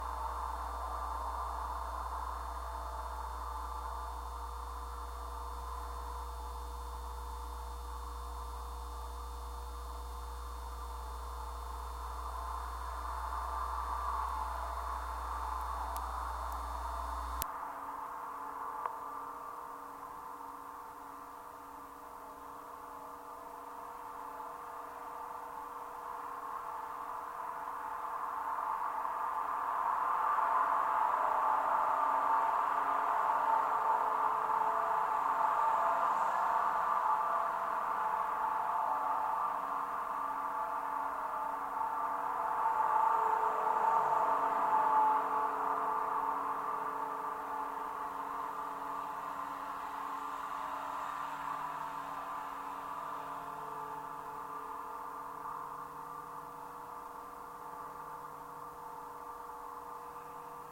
SC Agnews 02 power pylon
Contact mic recording of a power pylon (marked KFR PLM 55) on Lafayette Street in Santa Clara, California, in the Agnews district by the old sanitarium. Recorded July 29, 2012 using a Sony PCM-D50 recorder with a wired Schertler DYN-E-SET contact mic. Traffic noise, resonance, 60 Hz hum.
contact, contact-mic, contact-microphone, DYN-E-SET, field-recording, mains, mic, PCM-D50, power-hum, power-pylon, resonance, Schertler, Sony, traffic-noise, wikiGong